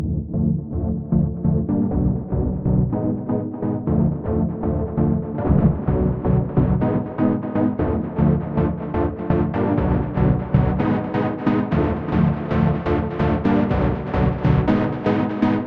Synth Loop 19
Synth stabs from a sound design session intended for a techno release.
sound, design, experimental, stab, electronic, sample, oneshot, line, music, pack, loop, synth, techno